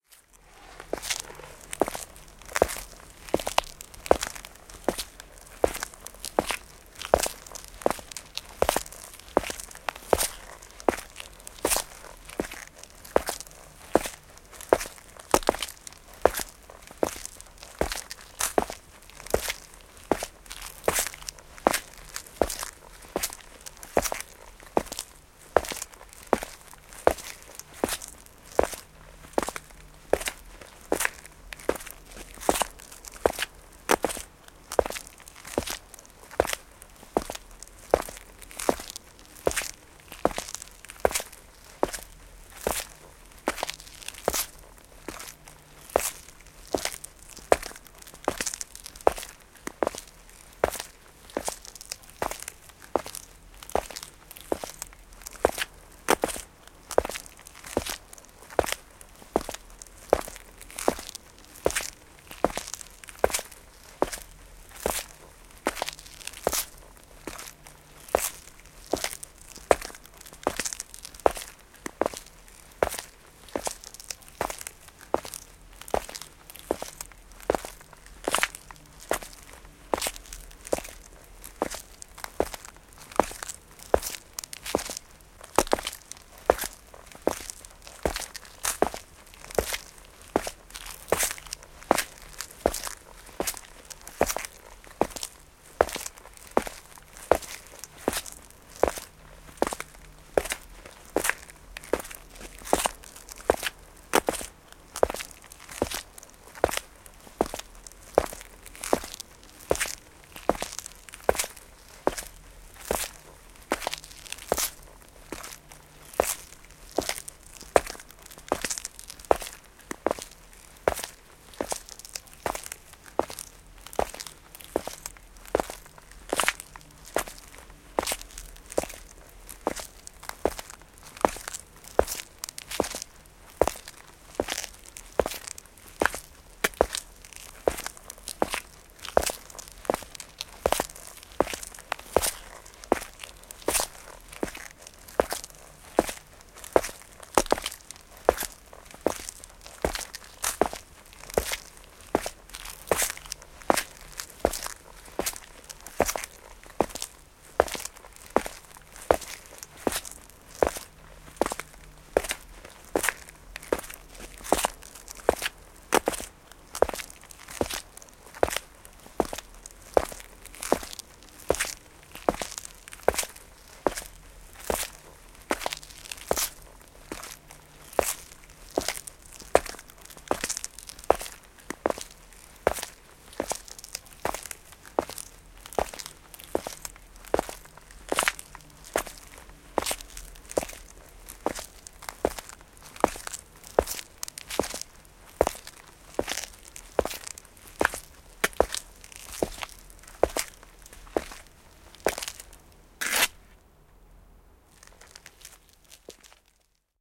Askeleet kadulla, mukulakivet / Footsteps, sedate, a man walking on cobblestones, a close sound
Mies kävelee rauhallisesti kadulla mukulakivillä, pysähdys. Sora kuuluu. Lähiääni.
Paikka/Place: Suomi / Finland / Lohja
Aika/Date: 14.11.1984
Askeleet, Cobblestone, Field-Recording, Finland, Finnish-Broadcasting-Company, Footsteps, Katu, Mukulakivi, Mukulakivikatu, Soundfx, Steps, Street, Suomi, Tehosteet, Walk, Yle, Yleisradio